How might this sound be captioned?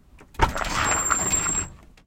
Winter - Opening Metal Screen Door
Opening a metal screen door through the snow. I'm not picky; I just want to see what this is used in.
door, metal, old, open